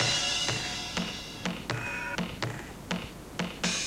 Cass 011 A CisBack Loop03
While looking through my old tapes I found some music I made on my Amiga computer around 1998/99.
This tape is now 14 or 15 years old. Some of the music on it was made even earlier. All the music in this cassette was made by me using Amiga's Med or OctaMed programs.
Recording system: not sure. Most likely Grundig CC 430-2
Medium: Sony UX chorme cassette 90 min
Playing back system: LG LX-U561
digital recording: direct input from the stereo headphone port into a Zoom H1 recorder.
Amiga, Amiga500, bass, cassette, chrome, collab-2, Loop, Sony, synth